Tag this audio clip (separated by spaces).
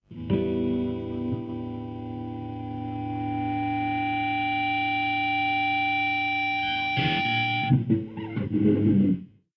guitar feedback